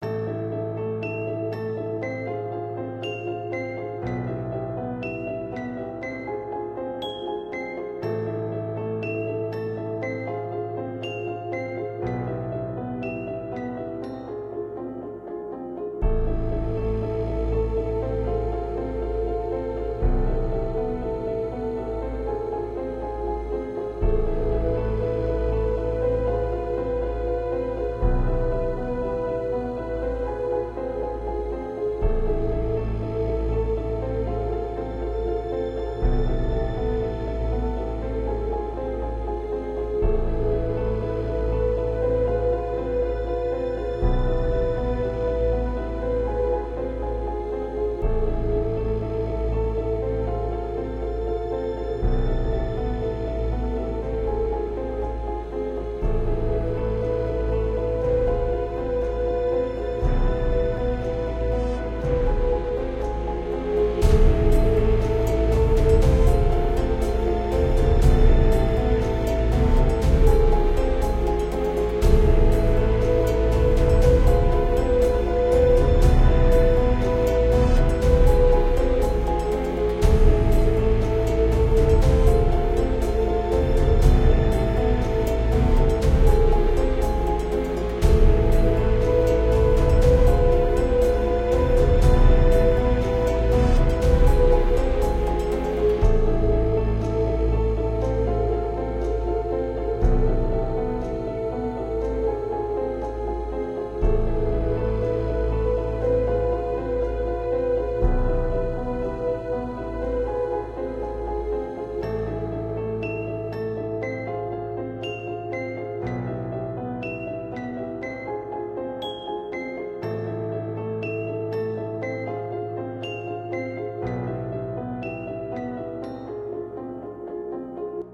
Dark loops 202 piano Remix (By: Josefpres)
Remixed tracks:
Remix Track: 1
Genre: Horror
horror, loop, remix